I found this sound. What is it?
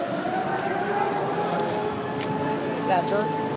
Registro de paisaje sonoro para el proyecto SIAS UAN en la ciudad de Palmira.
Registro realizado como Toma No 05 Calle 30 Carreras 28 y 29.
Registro específico sobre voces (Las Doce)
Registro realizado por Juan Carlos Floyd Llanos con un IPhone 6 entre las 11:30 am y 12:00 m el día 07 de marzo de 2.019
Doce, Palmira, Paisaje, 30, SIAS, Las, Proyect, Soundscape, 05, entre, Voces, No, Sonoro, y, 29, Sounds, Carrera, Toma, 28, Of